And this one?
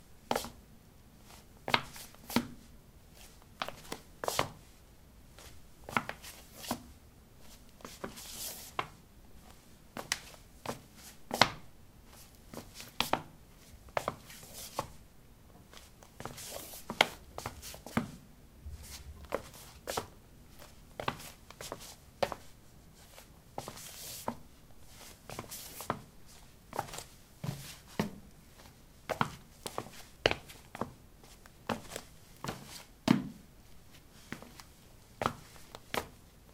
Shuffling on ceramic tiles: summer shoes. Recorded with a ZOOM H2 in a bathroom of a house, normalized with Audacity.